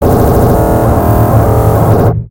sound for glitch effects